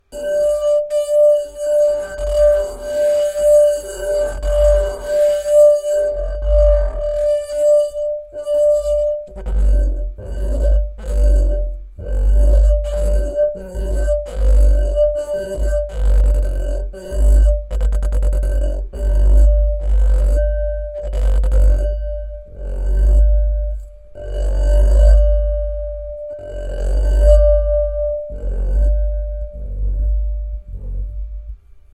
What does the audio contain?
bohemia glass glasses wine flute violin jangle tinkle clank cling clang clink chink ring